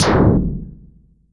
One of several versions of an electronic snare created using a portion of this sound
which was processed in Reason. Further processing (EQ and trimming) in Audacity.
Audacity, dare-26, drum, electronic, image-to-sound, processed, Reason, snare